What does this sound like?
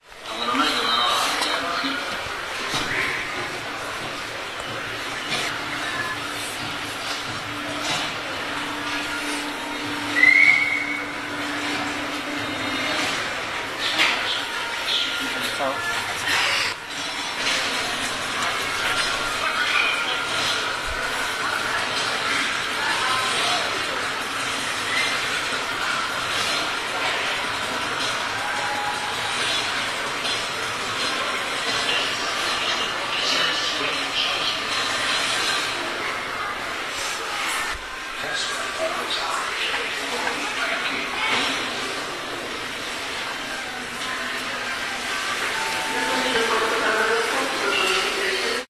19.12.2010: about 19.00. tv section in Real supermarket in M1 commercial center in Poznan on Szwajcarska street in Poznan.